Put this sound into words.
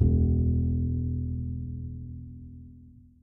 Low bass note
Plucked bass string.
bass, instrument, sound-design